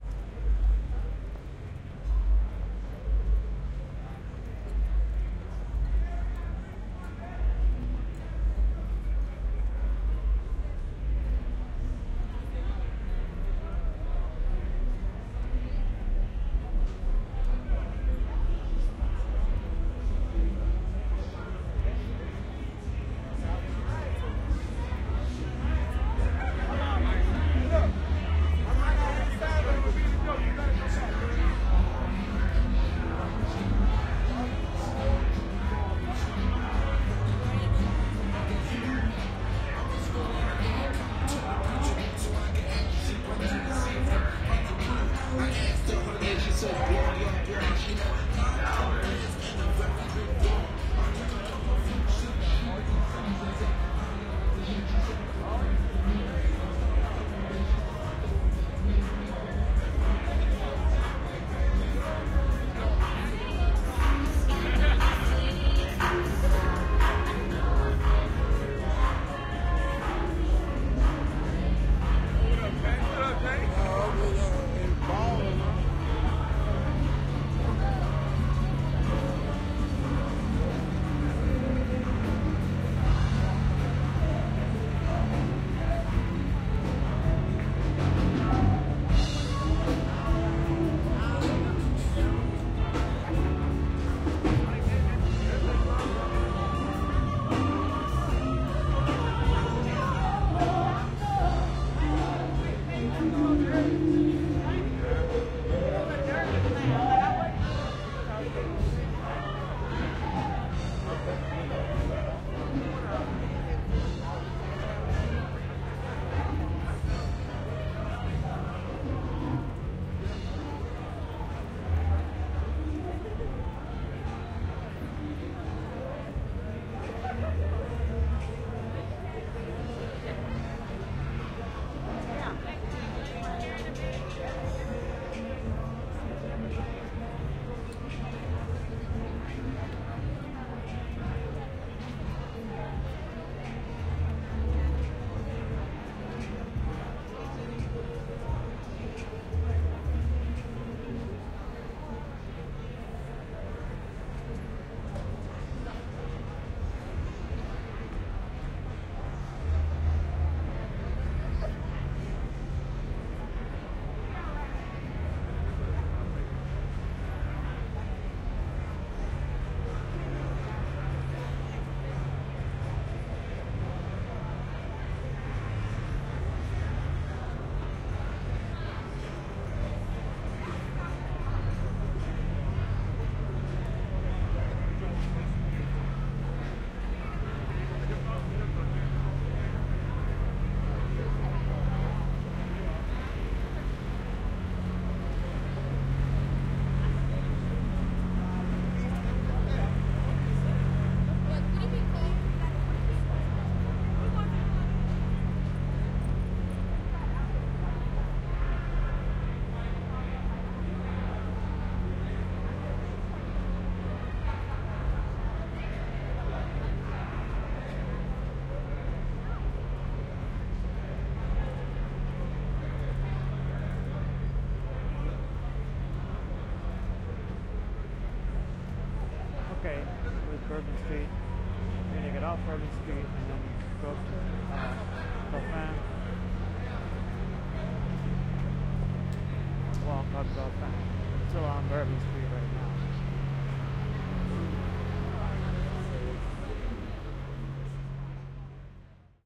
Walking southwest on Bourbon Street in the French Quarter in New Orleans as the world passes by. Recorded using a binaural headpiece with a Zoom H4 on 27 November 2010 in New Orleans, LA, USA.